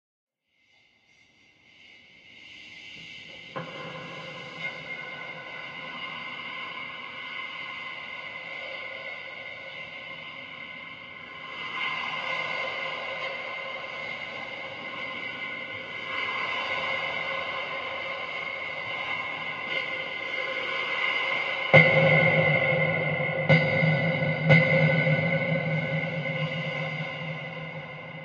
Dark Atmos Suspense
Efecto de sonido que representa una atmosfera oscura y de terror
atmos, reverb, sfx, suspense